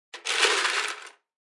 Ice Crush Bucket
Wine bottle crushing ice in bucket. Recorded on Sennheiser ME66/K6 Shotgun.
bucket, crush, ice, wine-bottle